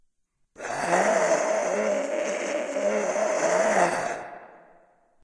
Magic Death
Evil being defeated.
monster, magic, death, evil, demonic